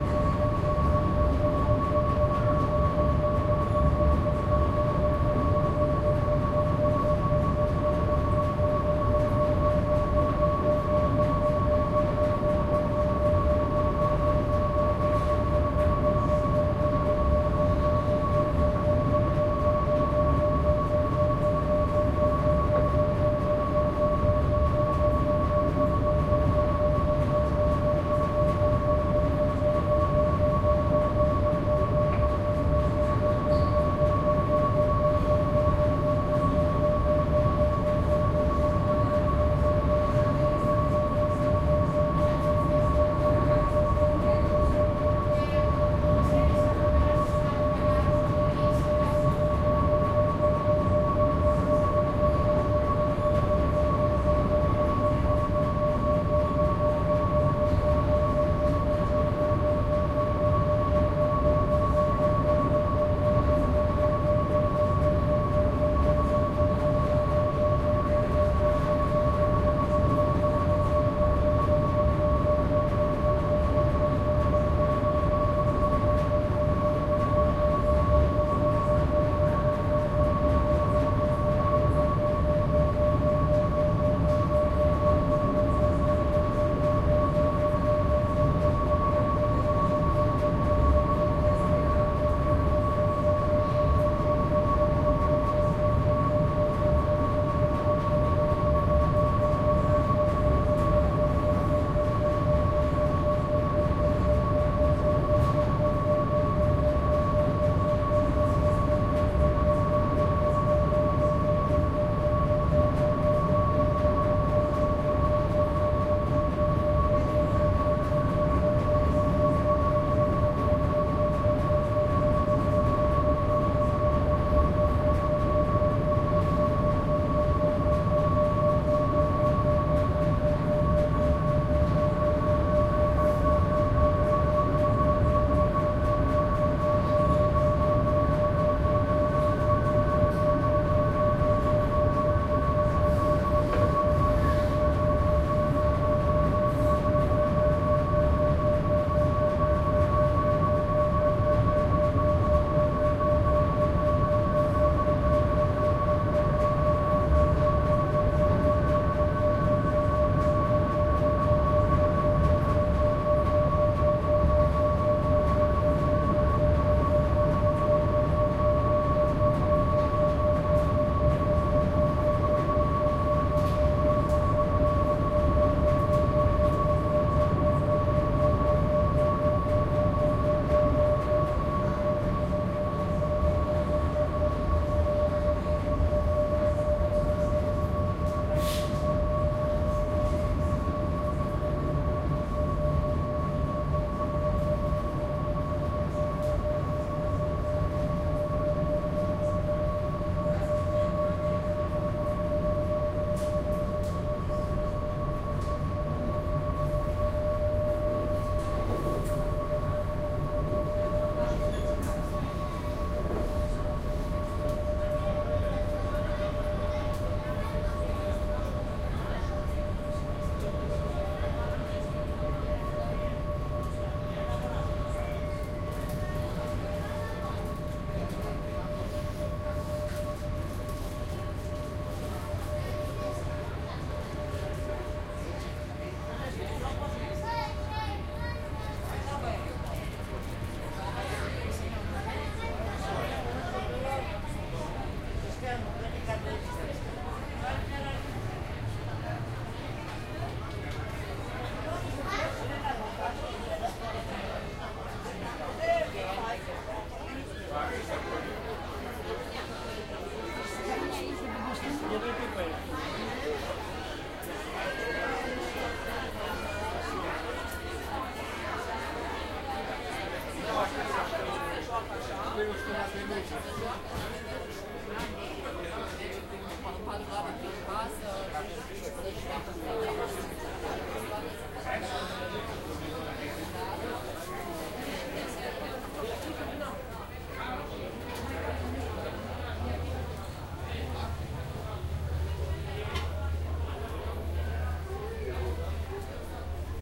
Ferry engine room
Recorded with a Zoom H2n on the Ferry to Samothraki, Greece.
eninge, ambient, deep